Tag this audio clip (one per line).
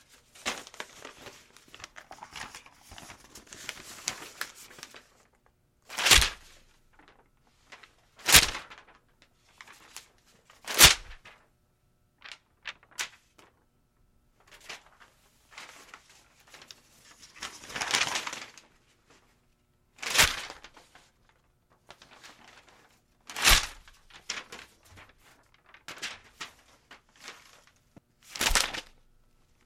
sheet
throw-paper
paper
page